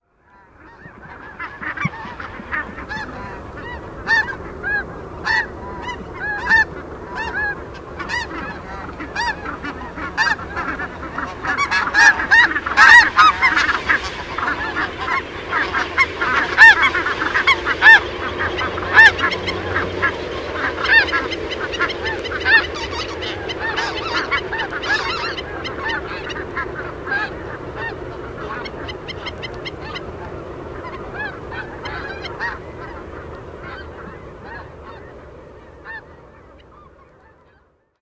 geese overhead 03feb2010
Recorded February 3rd, 2010, just after sunset.
california, geese, sherman-island